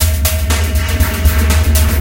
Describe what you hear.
This rhythmic drone loop is one of the " Convoloops pack 03 - China Dream dronescapes 120 bpm"
samplepack. These loops all belong together and are variations and
alterations of each other. They all are 1 bar 4/4 long and have 120 bpm
as tempo. They can be used as background loops for ambient music. Each
loop has the same name with a letter an a number in the end. I took the
This file was then imported as impulse file within the freeware SIR convolution reverb and applied it to the original loop, all wet. So I convoluted a drumloop with itself! After that, two more reverb units were applied: another SIR (this time with an impulse file from one of the fabulous Spirit Canyon Audio CD's) and the excellent Classic Reverb from my TC Powercore Firewire (preset: Deep Space). Each of these reverbs
was set all wet. When I did that, I got an 8 bar loop. This loop was
then sliced up into 8 peaces of each 1 bar. So I got 8 short one bar
loops: I numbered them with numbers 00 till 07.